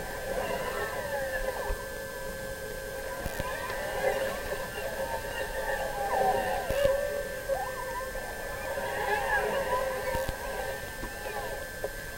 alien girls voices modulation chorus
electronic algorithmic sonic objects